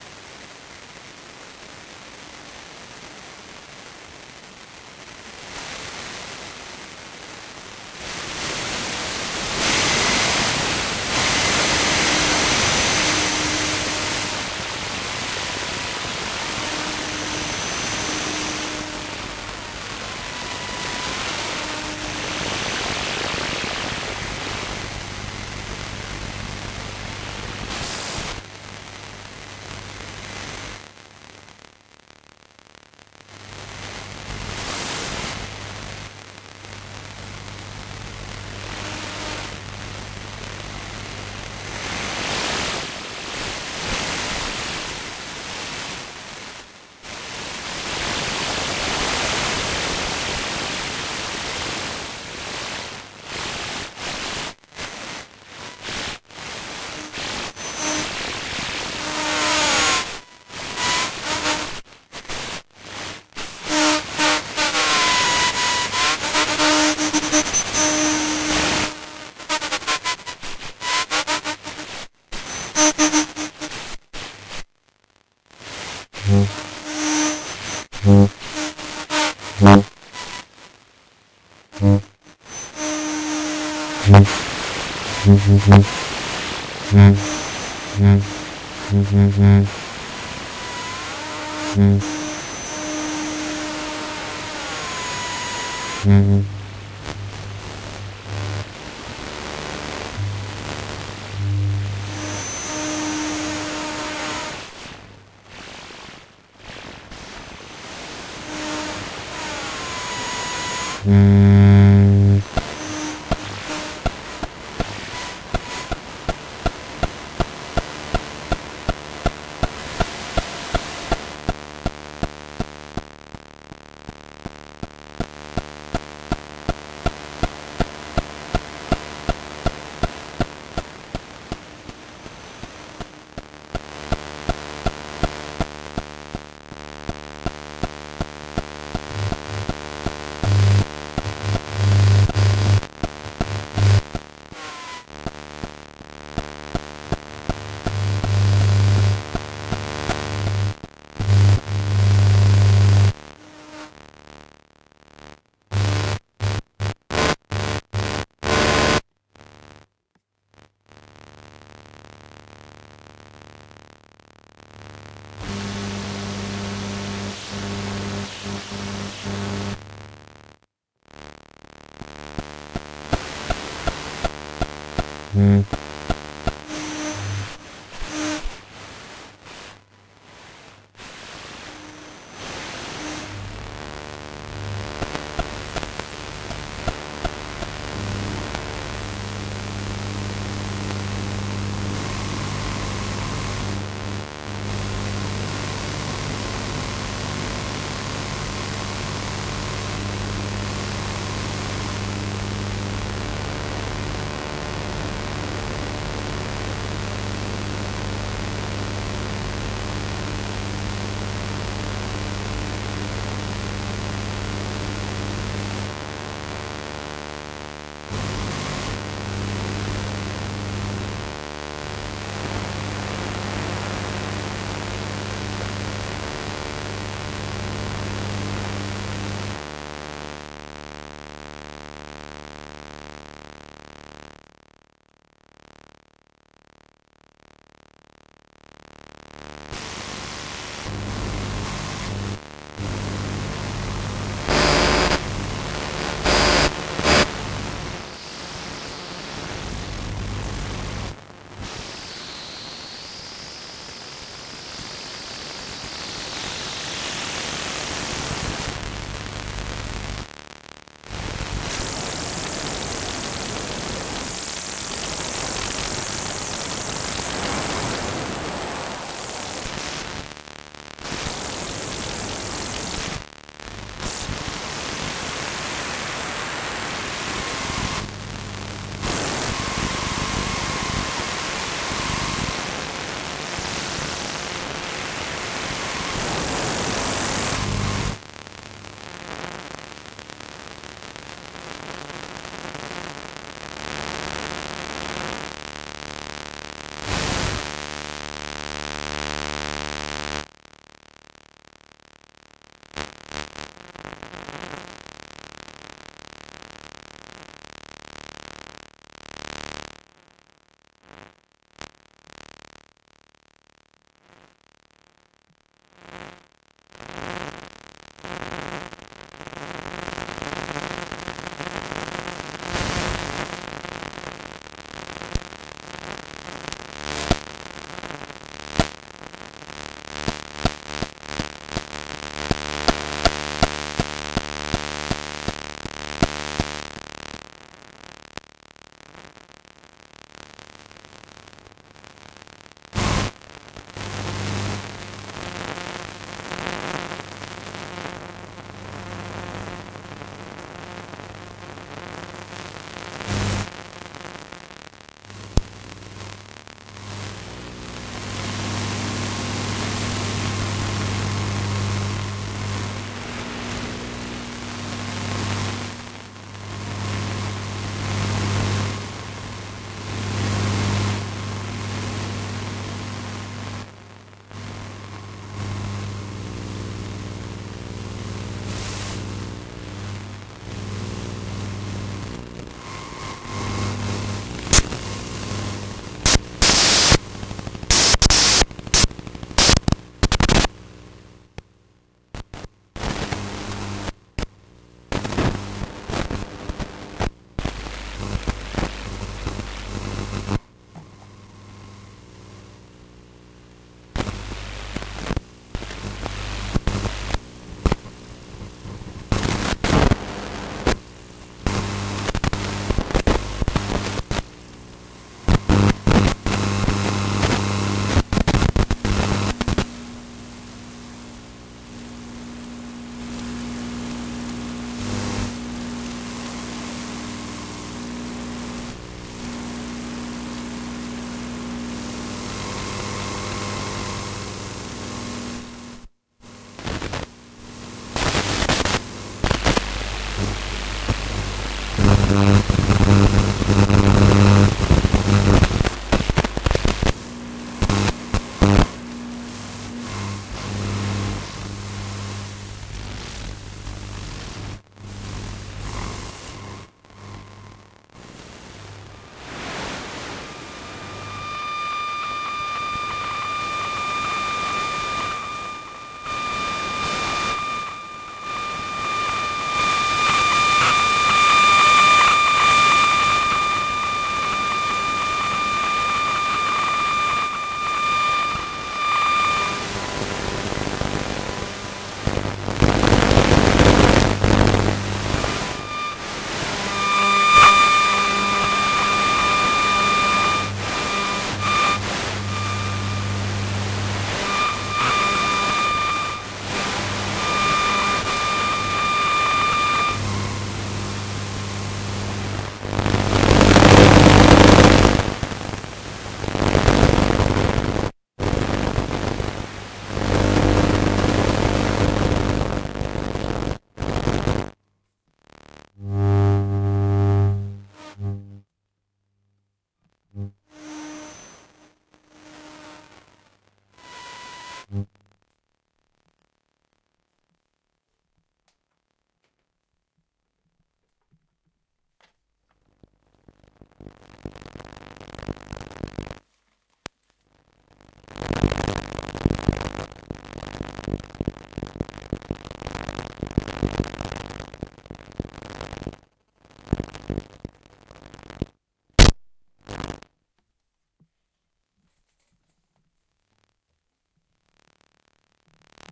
Soma Ether Recording Electromagnetic field
Recorded with Ether from Soma and H2N Field Recorder